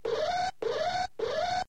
Alarm Malfunction
faster-alarm, siren, small